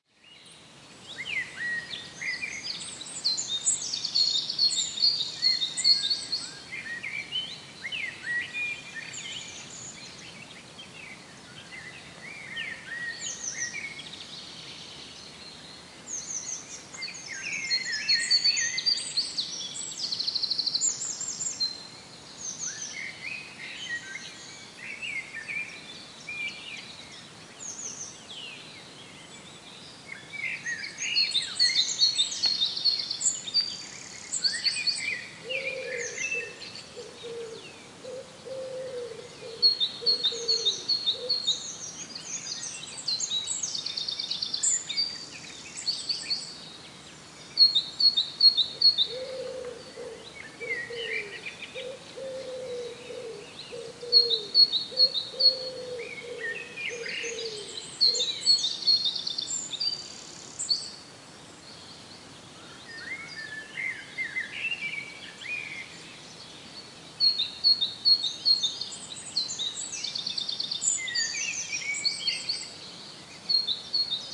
Birds in the Natura 2000 forest of Liefstinghs in Westerwold, province of Groningen